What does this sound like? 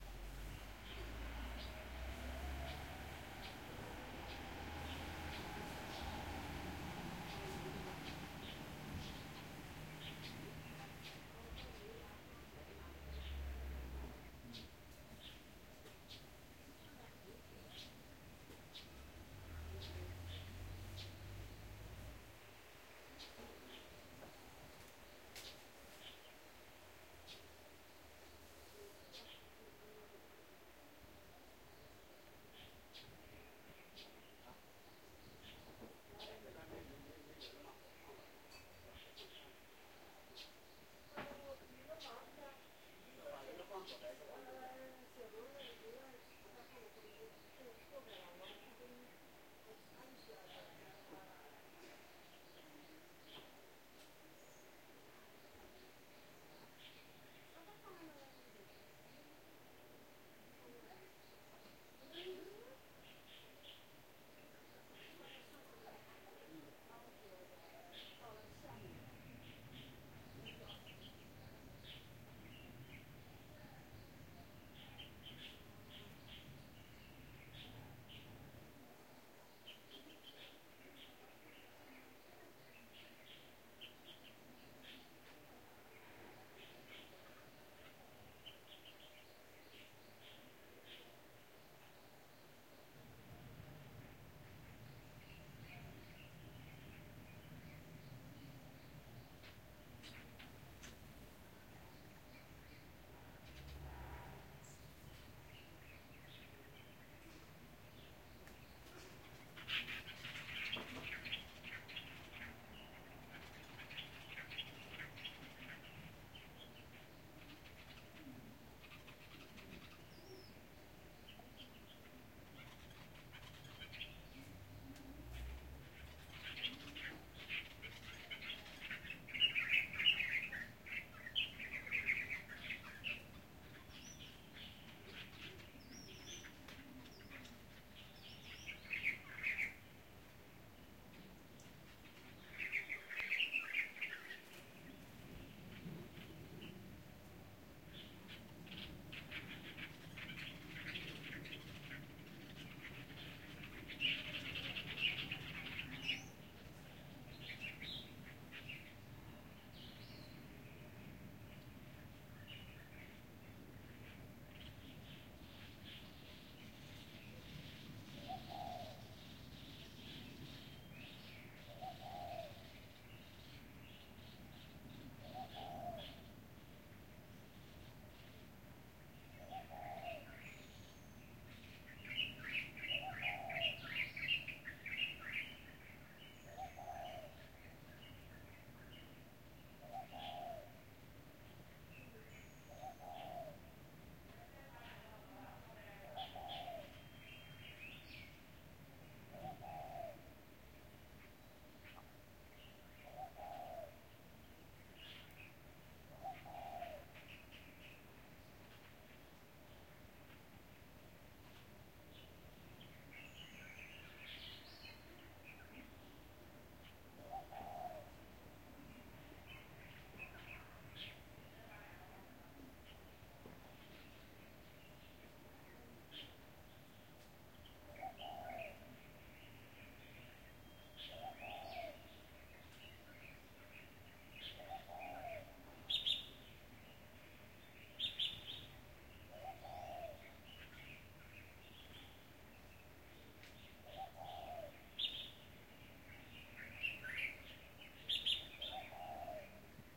Tea Garden Ambience: Birds and Talking
May 18, 2017
Recorded at a Tea Garden in Yong Fu Temple, Hangzhou (30°14'23.6"N 120°05'52.3"E), with my Samsung Galaxy S7.
No other processes.
Ambience, Birds, Feilai, Field-recording, Garden, Hangzhou, Lingyin, Nature